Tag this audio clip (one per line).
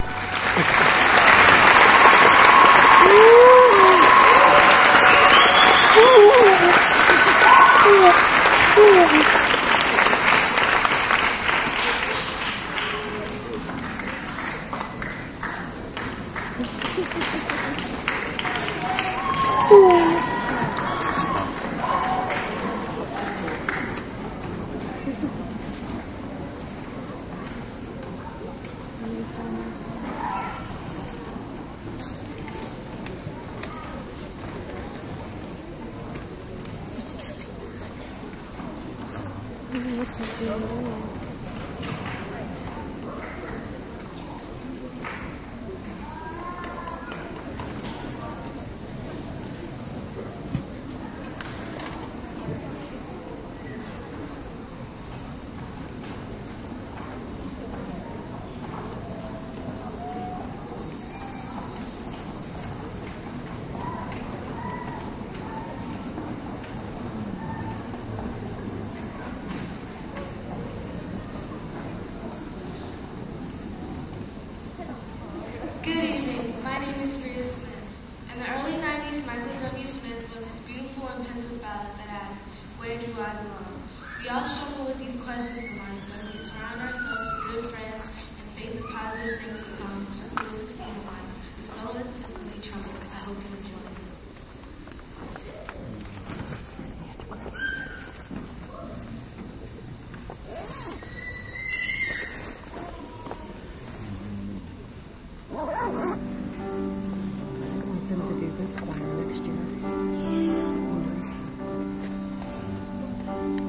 audience
crowd
auditorium
applause